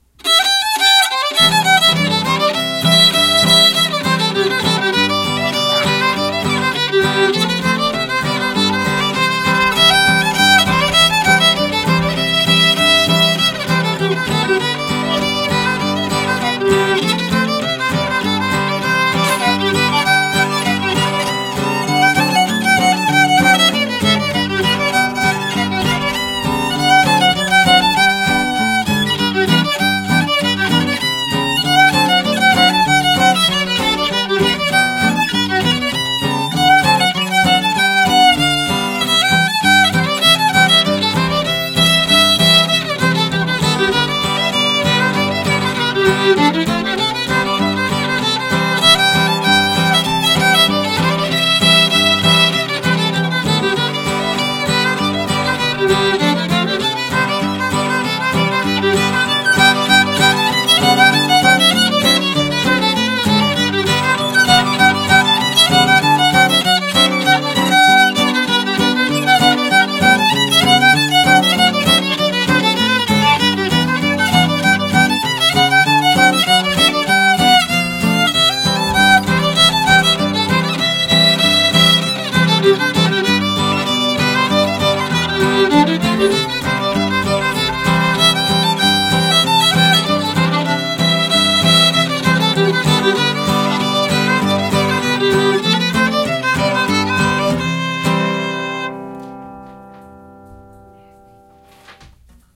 Denver Belle
My fiddle teacher playing guitar backup as yours truely plays the violin rather poorly.
country, fiddle, fiddle-music, melodic, music, solo, solo-violin, song, violin